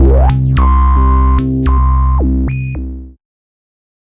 Acid Bass: 110 BPM C2 note, not your typical saw/square basslines. High sweeping filters in parallel with LFO routed to certin parts sampled in Ableton using massive, compression using PSP Compressor2 and PSP Warmer. Random presets, and very little other effects used, mostly so this sample can be re-sampled. 110 BPM so it can be pitched up which is usually better then having to pitch samples down.
110, 808, 909, acid, bass, bounce, bpm, club, dance, dub-step, effect, electro, electronic, glitch, glitch-hop, hardcore, house, noise, porn-core, processed, rave, resonance, sound, sub, synth, synthesizer, techno, trance